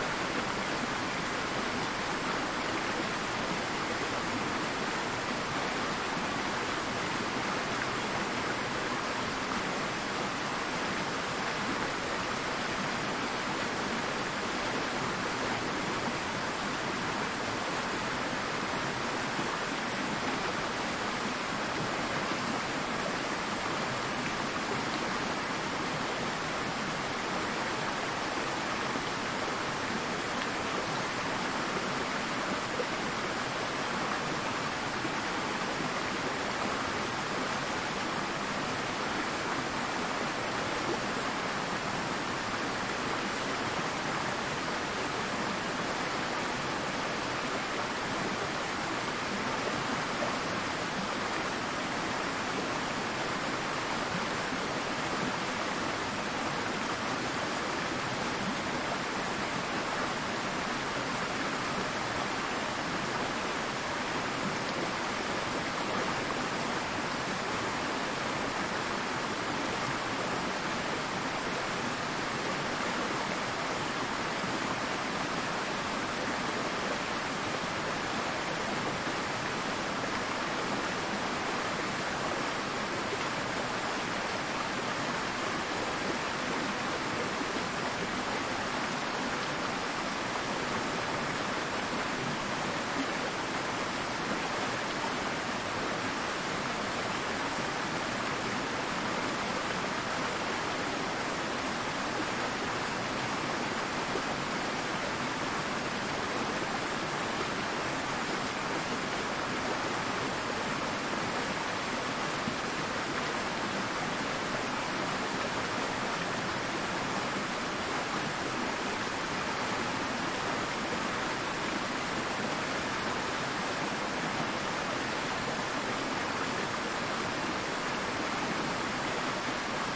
Water stream.
Recorded in November 2016, in Sibuyan island (Romblon, Philippines), with an Olympus LS-3 (internal microphones, TRESMIC ON).